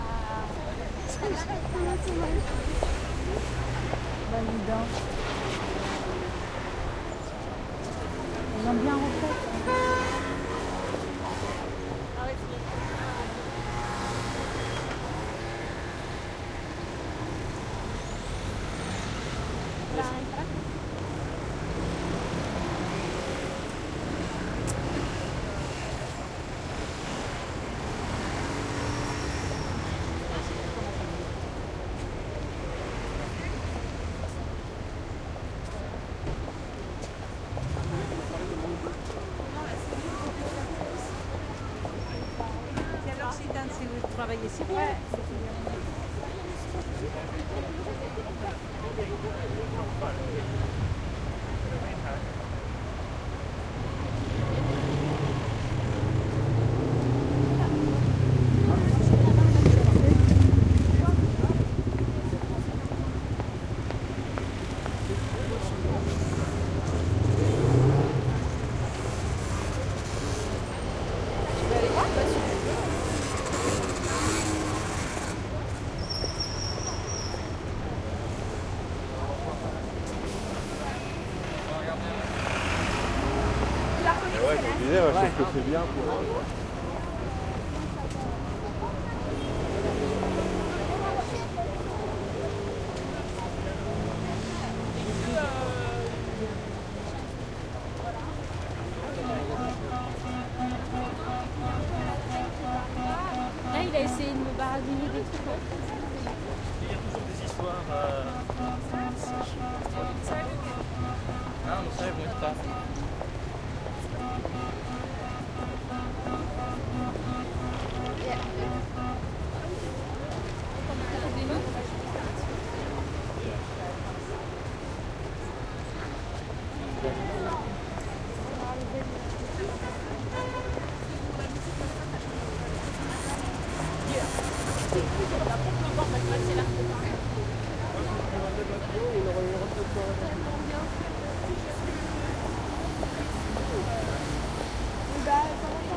recorded somewhere in marais, paris.
motorbike, people, road, street